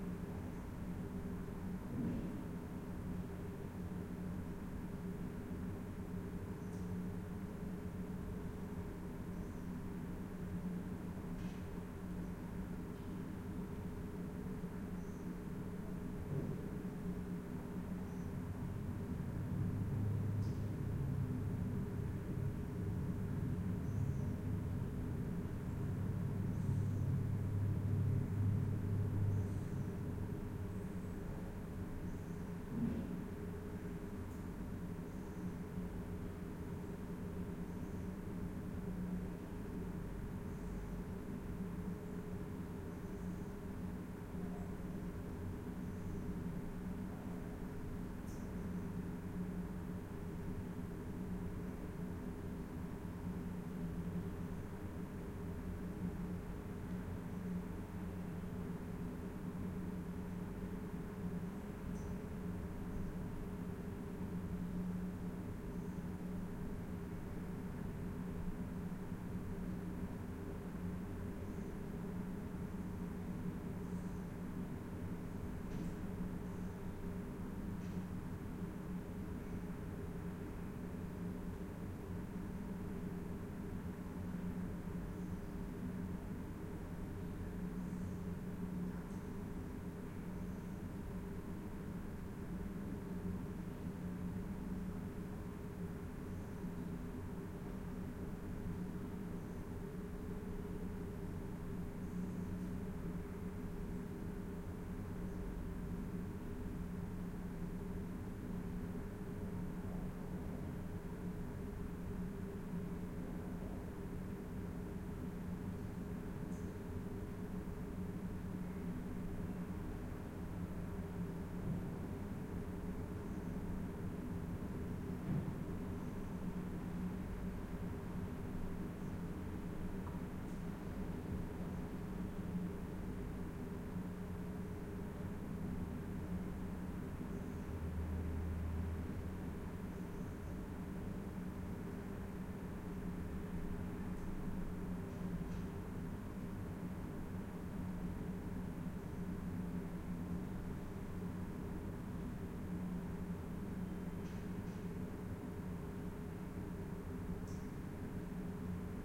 RoomTone Large WC Locker Room Ventilation
Room Tone recorded with Schoeps ORTF microphone/Nagra LB recorder
Locker-room, Room-tone, Ventilation, WC